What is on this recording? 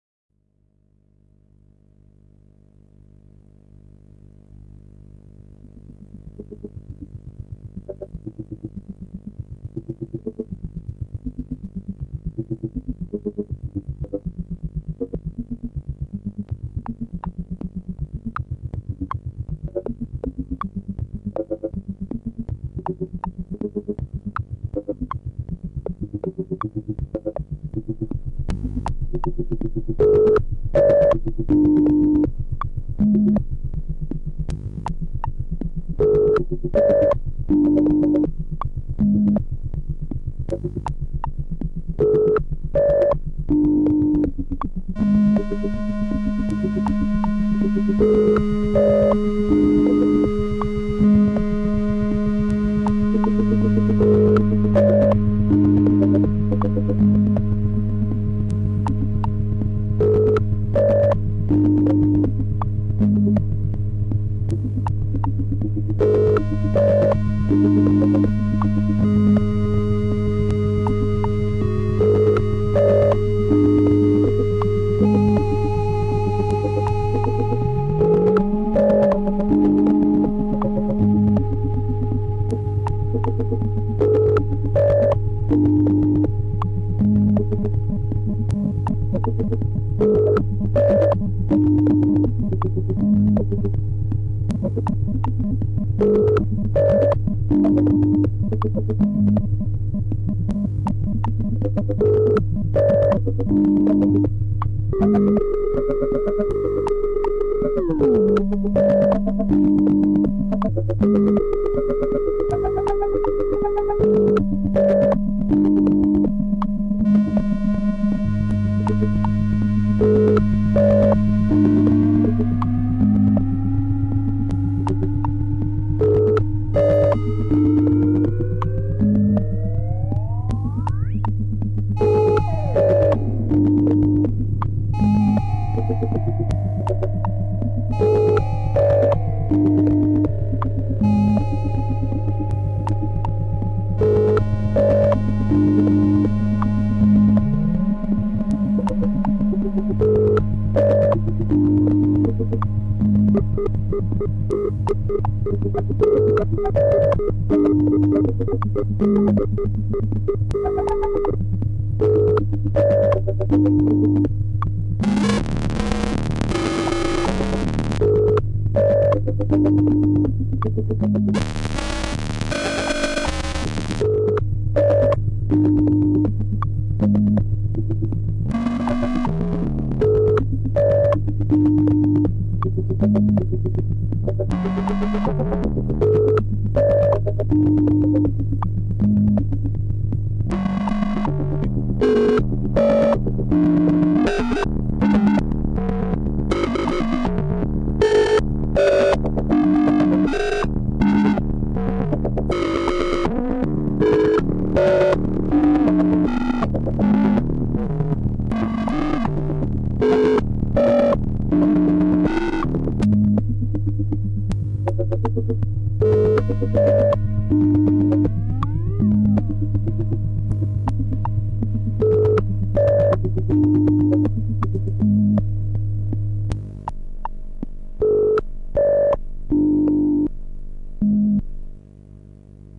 Melody @ 160 bpm
alien, 160bpm, melody, synth, ambient, oscillator, modular, generative, analog, vco